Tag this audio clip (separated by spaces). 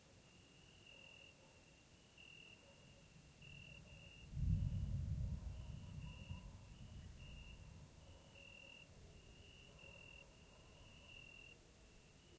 thunder deep distant strike suburb thunderstorm weather lightning bass ambient rolling-thunder rain field-recording nature rolling rumbling rumble thunder-storm storm